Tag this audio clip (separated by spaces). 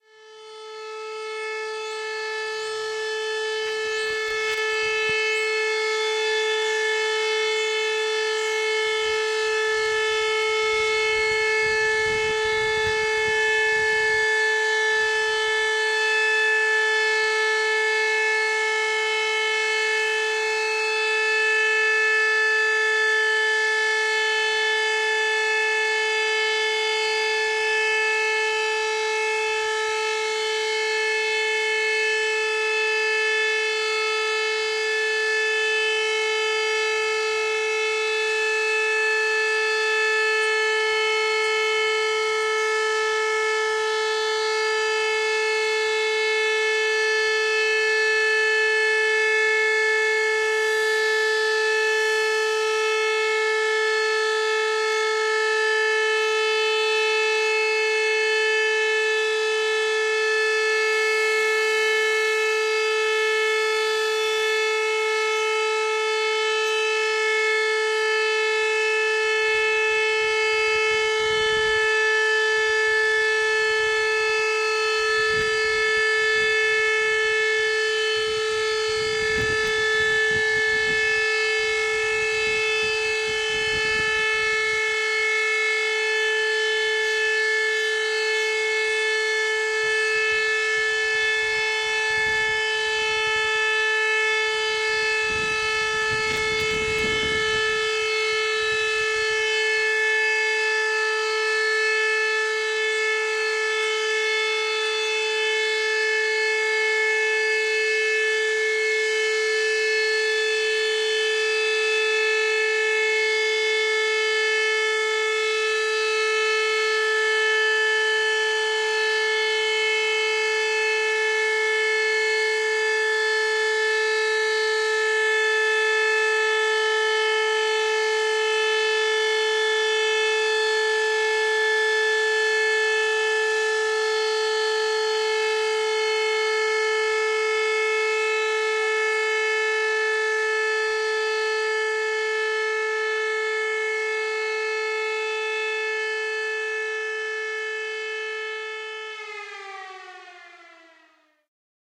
ambient recording syren noise field